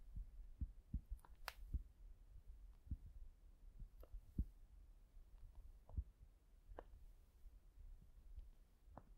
Clicking right shoulder 2
In the last few days my right shoulder has been making some interesting clicking noises. Mostly it is not painful and seems to be disappearing by itself.
Recorded this morning with my ageing (soon to be retired) Zoom H1.
bones, clicking, crack, cracking, joints, shoulder